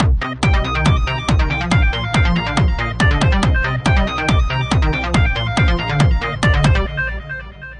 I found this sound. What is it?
Land of Sand 2
I have just the oscillator kick and a basic bassline now. The soft synth is for a subtle rising feeling.